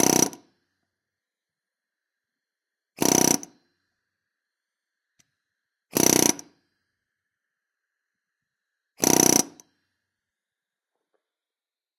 Pneumatic hammer - Atlas Copco r1 - Start 4
Atlas Copco r1 pneumatic hammer started four times.
4bar, 80bpm, air-pressure, atlas-copco, crafts, hammer, labor, metalwork, motor, pneumatic, pneumatic-tools, tools, work